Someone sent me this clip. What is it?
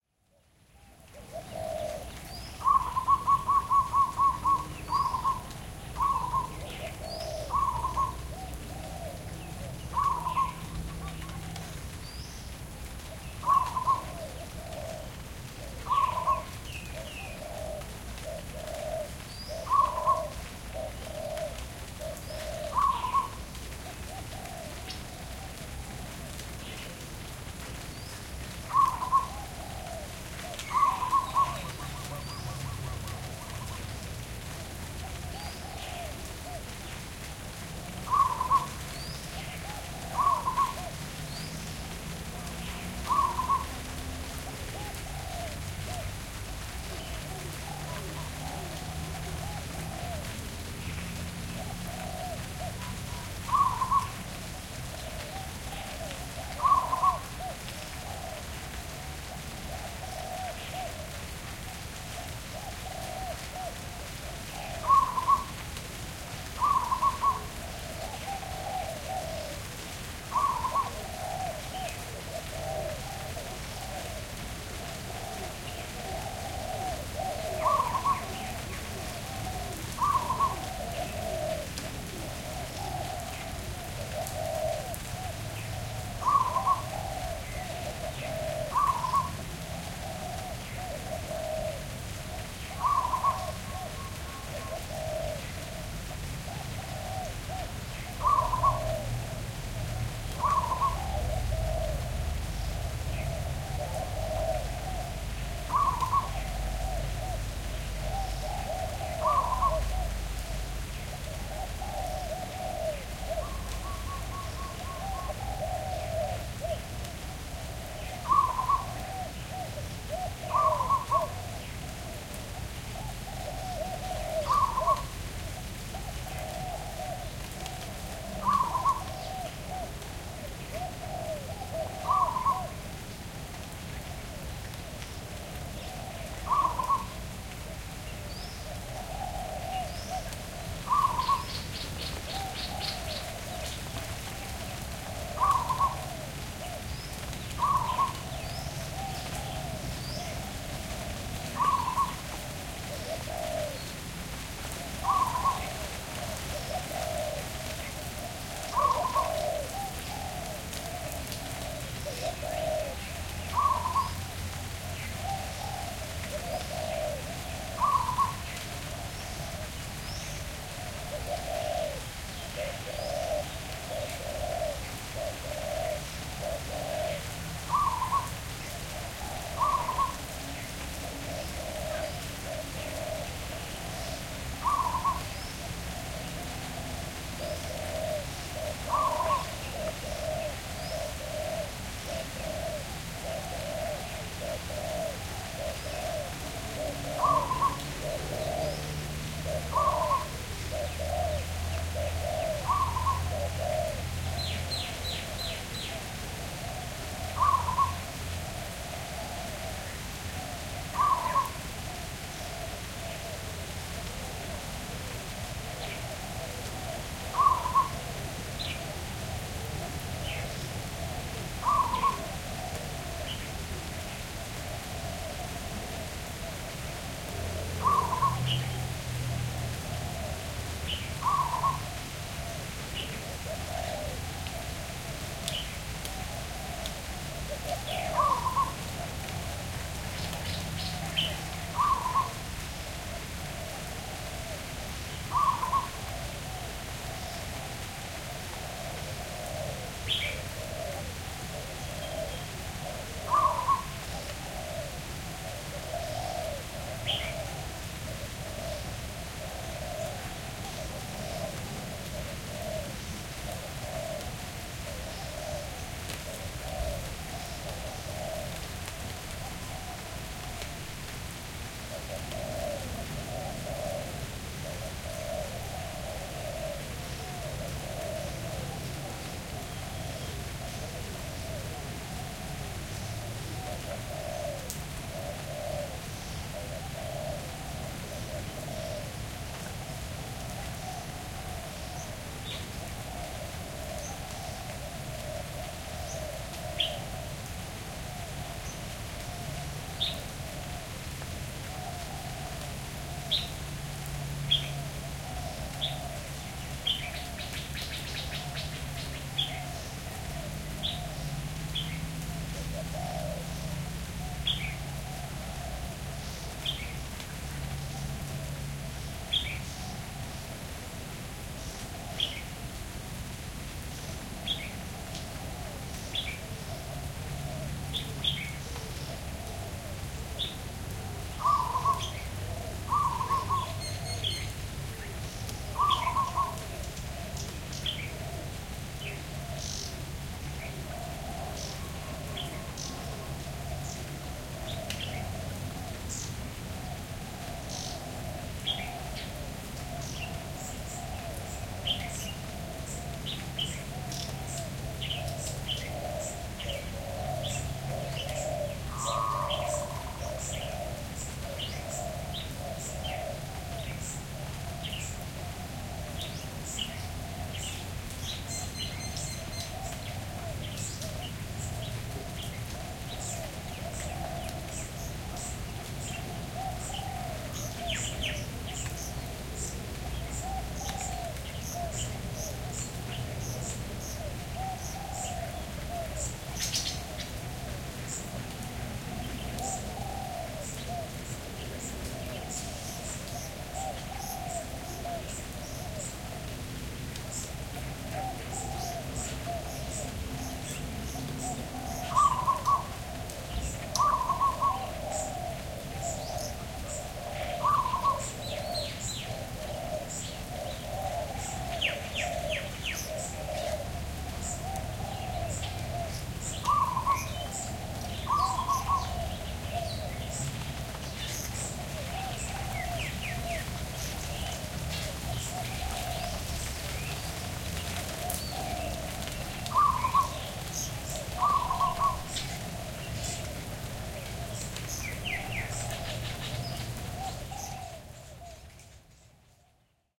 AMBRurl 10 30hrs ORTF Thailand Countryside Birds Insects Rain Alex Boyesen
Recorded ambient sound in my garden in Thailand. See file name for time of day. Recorded by Alex Boyesen from Digital Mixes based in Chiang Mai production and post production audio services.
field-recording, Digital-Mixes, countryside, insects, Thailand